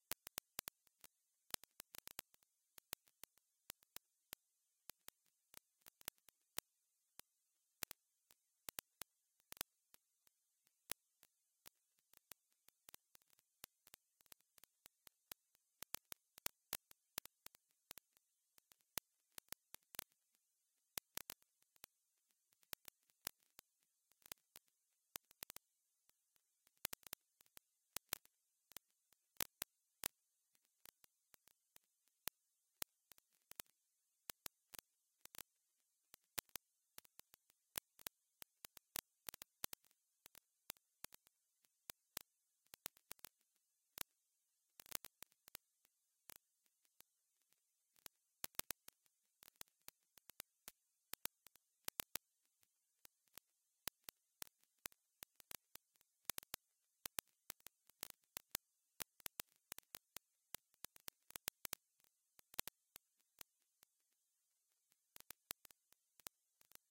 vinyl crackle stereo 99
puredata noise vinyl stereo sample